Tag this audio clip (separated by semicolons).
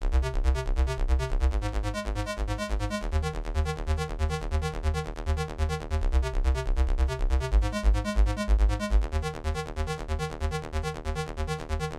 bassline; effects; original; sample